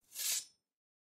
Sword Draw 02
My second sword idea for my uni project. Any feedback would be great. Enjoy. Created with two swords being run together.
Draw, Sword